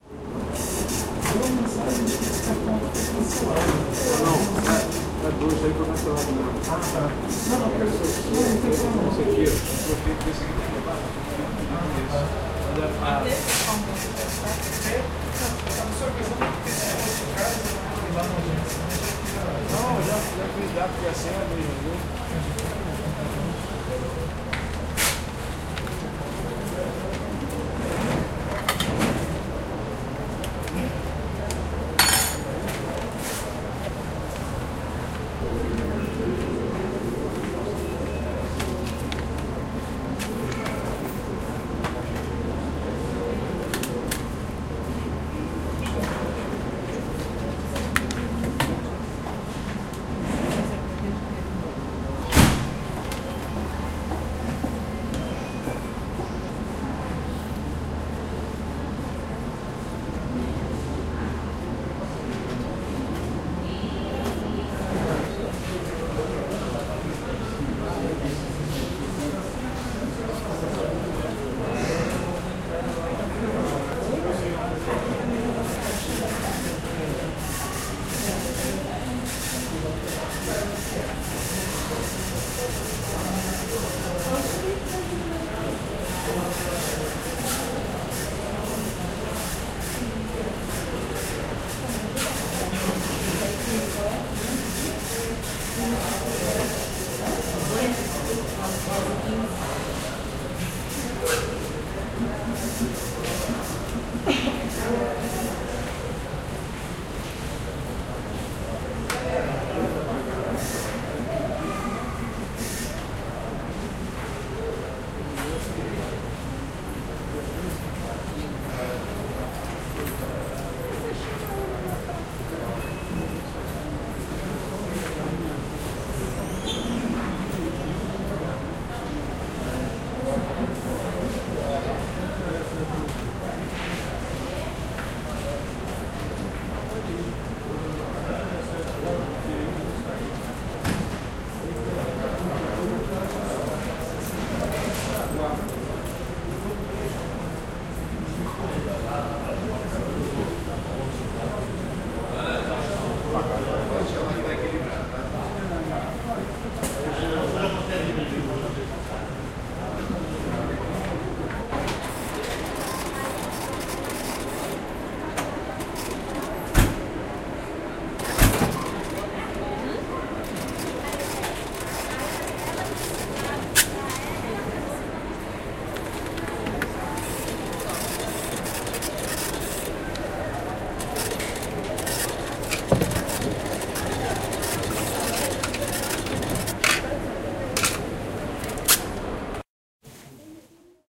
Edmonton store circa July 2002
Recorded in July 2002 when I was on a trip to Edmonton, Alberta, Canada. We start near the entrance of the store where the checkout is, walk around then we finish after we again pass the checkout. You actually hear the sound of me buying something, may have been a pack of Pokémon cards or something like that. You do hear some people speaking I believe Ukrainian there, as Edmonton does have a fair-sized Ukrainian population.
I cannot remember which exact store this is in Edmonton, but it was not a Walmart or Zellers.
I dedicate this to my friend Peter who was actually born in Edmonton, and it was his parents we stayed with during our trip. R.I.P. Pete.
Note: I did edit the sound from the original tape recording as near the end a few of us including myself, my Aunt Harriet, Peter and a living friend of mine are talking for about 30 or so seconds, but I did my best to seamlessly edit so that the jump won't be obvious.
2000s, 90s, alberta, canada, cash, cash-register, checkout, department-store, edmonton, electronic, printer, printing, retail, retro, shop, shopping, store, supermarket